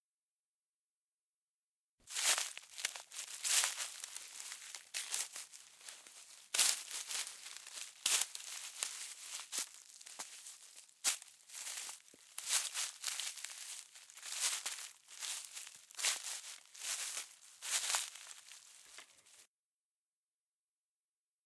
walking the leaves
Slow walking on dry leaves.
steps, walk, Panska, foot, walking, leaves, step, footsteps